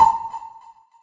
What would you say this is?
jillys sonar4
Sonar sound made with granulab from a sound from my mangled voices sample pack. Processed with cool edit 96. More effects added.
granular, jillys, sonar, synthesis